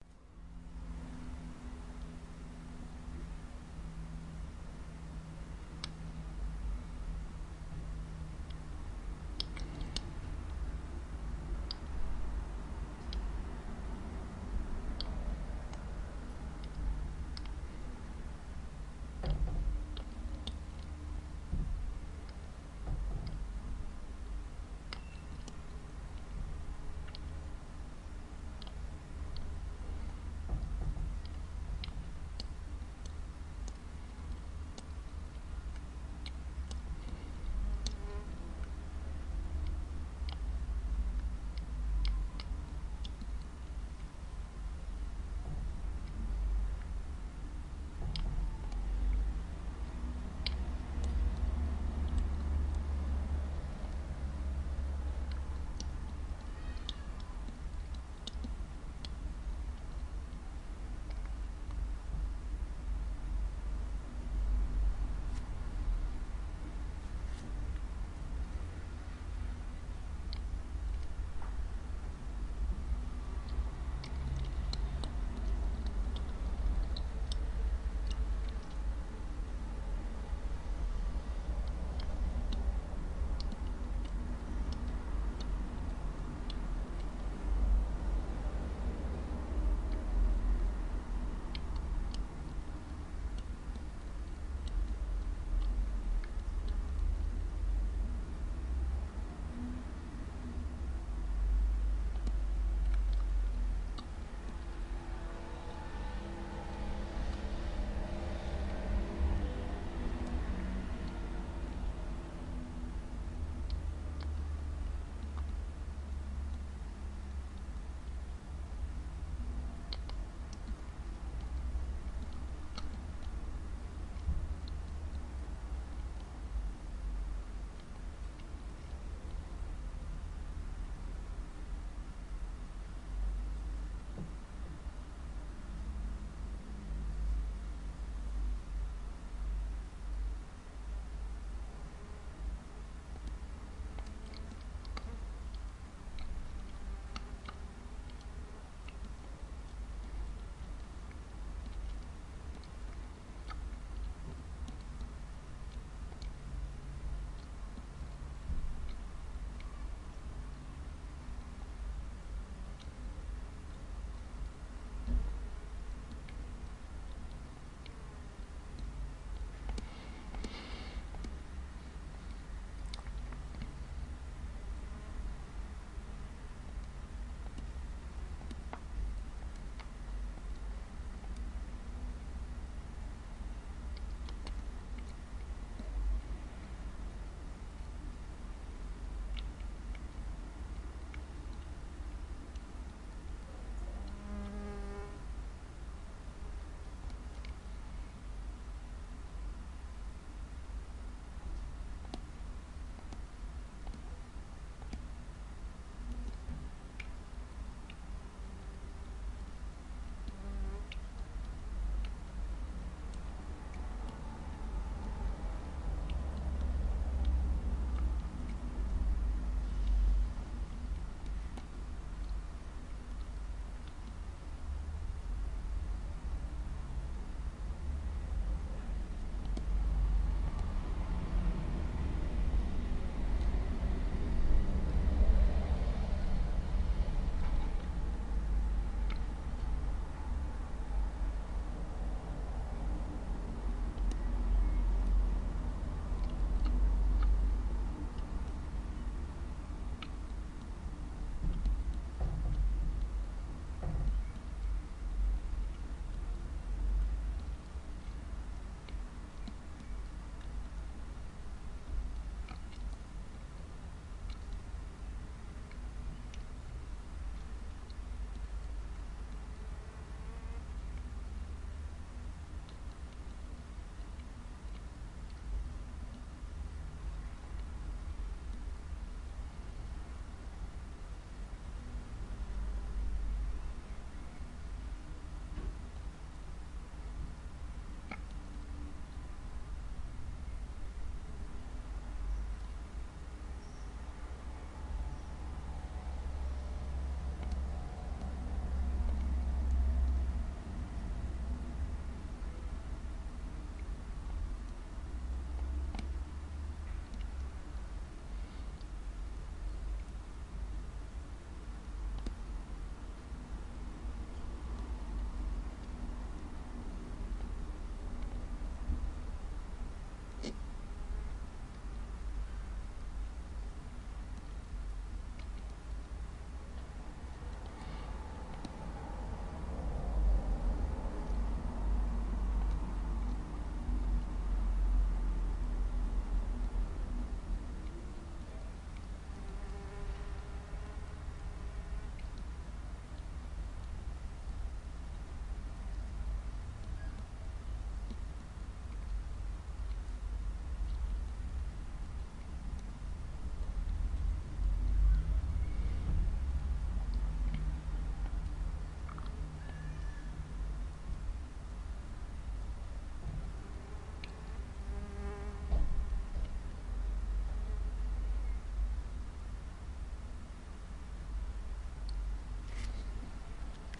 Environnement Ambiance 002
Ambiance, Environnement